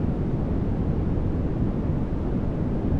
A flight noise generated from white noise.